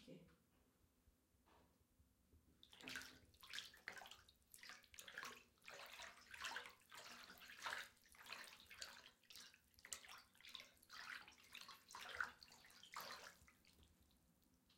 waves circular soft water sea splash sounds environment natural surrounding field-recording